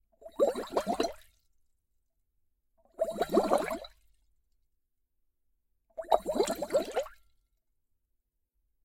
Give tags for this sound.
Bubbles Burst Short

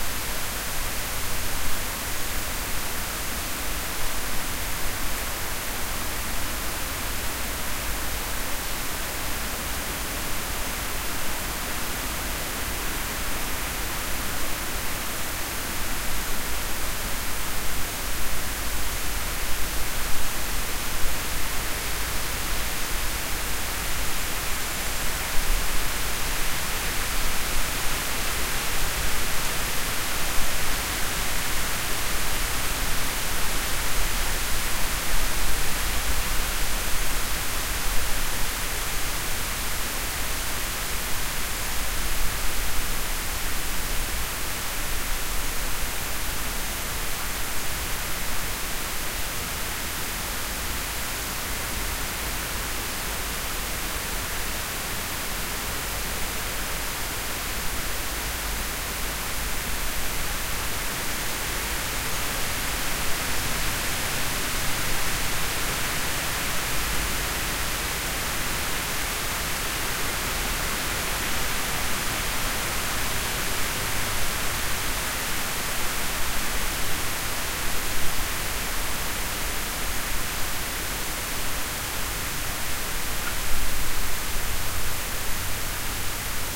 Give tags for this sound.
field-recording nolde-forest trees wind

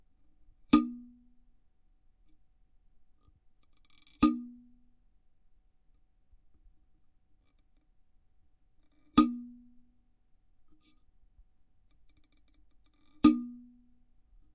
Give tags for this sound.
can fx percussive

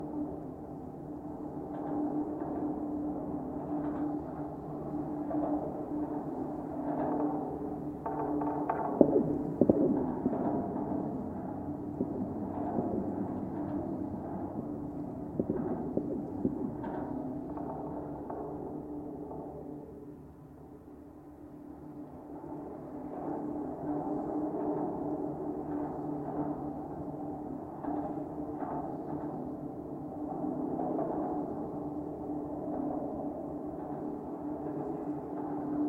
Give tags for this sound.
bridge cable contact contact-mic contact-microphone DYN-E-SET field-recording Golden-Gate-Bridge Marin-County mic PCM-D50 San-Francisco Schertler Sony steel wikiGong

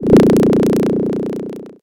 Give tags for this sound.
8-bit glitch glitch-effect kicks rhythmic-effect

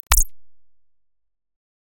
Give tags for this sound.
soundeffect electronic

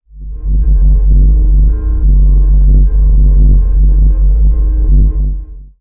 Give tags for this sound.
sinister background-sound anxious ambient pulsing atmosphere

